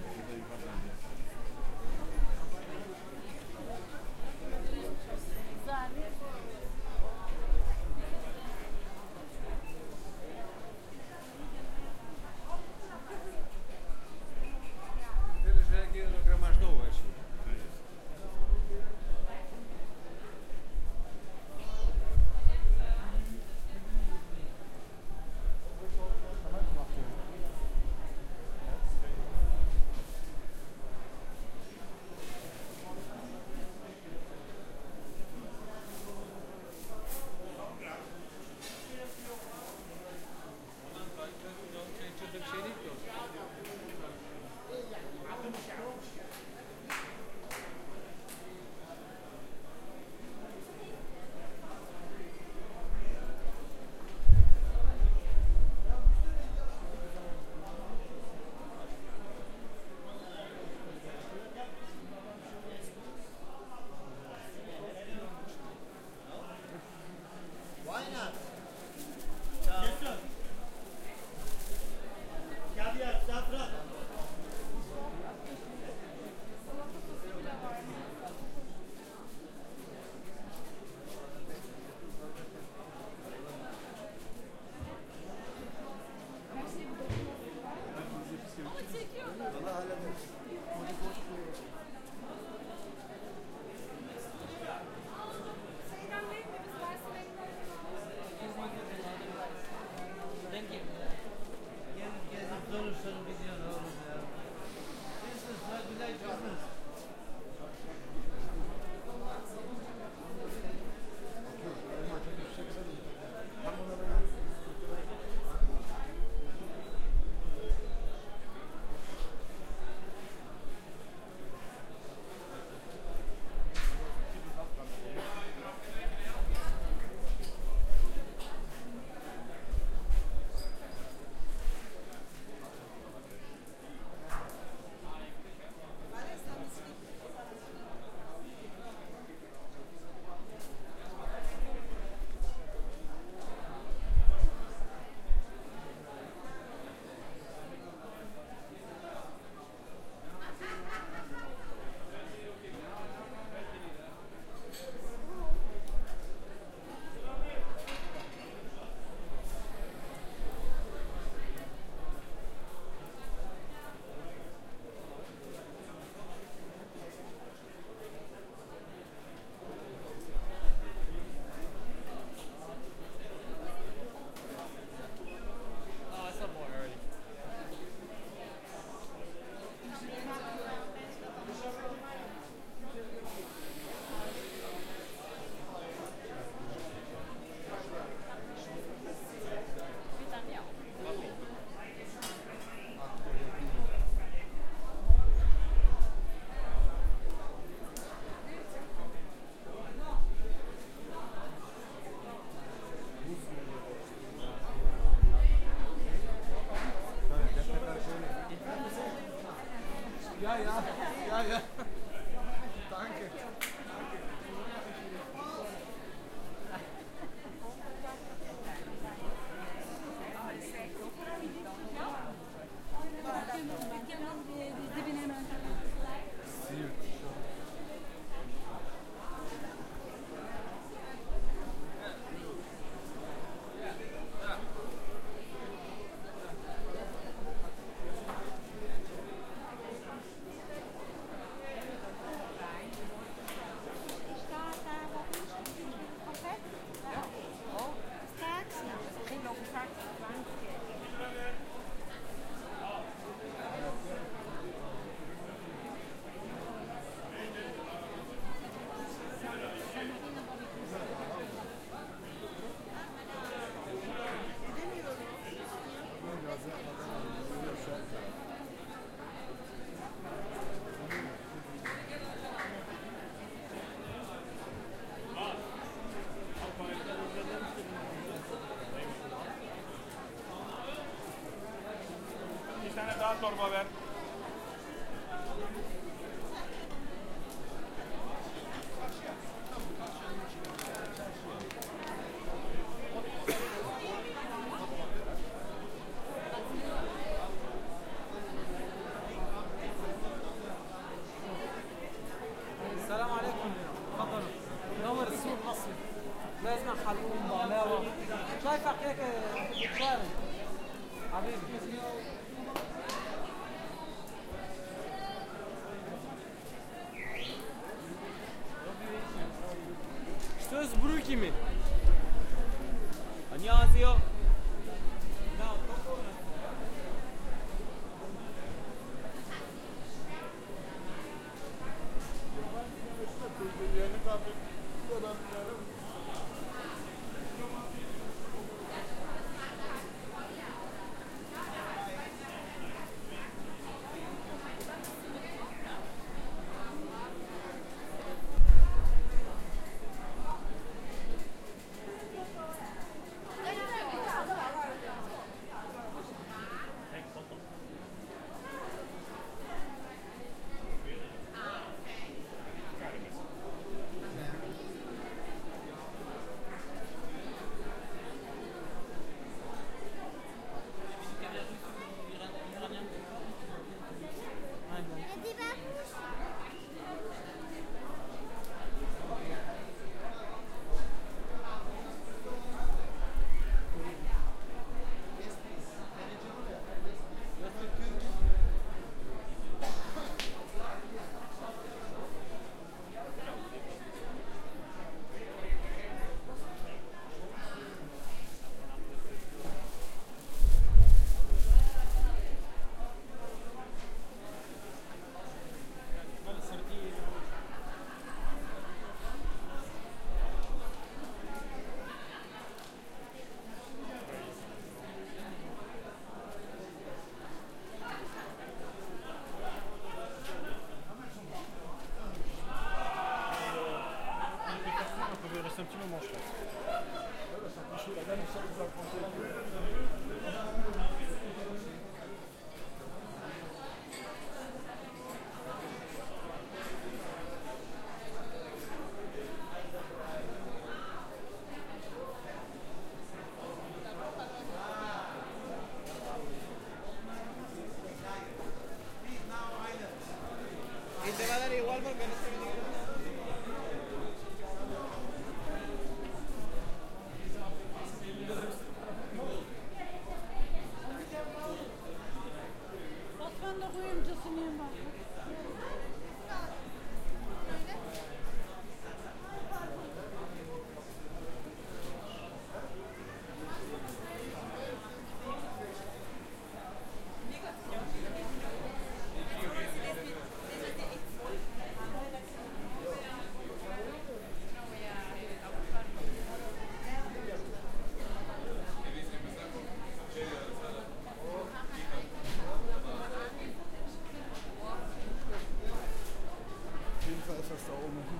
Istanbul Spice Bazaar (aka Egyptian Bazaar) ambience
I recently have been to Istanbul (once again) and have recorded this ambience with my Zoom H4n recorder just by walking inside this old and unique spice market, which exist from at least XVII century.
Recorded May 15, 2015
ambient, field, Turkey, soundscape, bazaar, Instanbul, market, ambience, people, field-recording